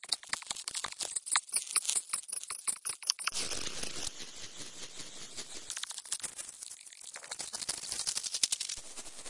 result sounds like insects, maybe? done with Native Instruments Reaktor and Adobe Audition.
2-bar, electronic, hiss, industrial, loop, odd, sound-design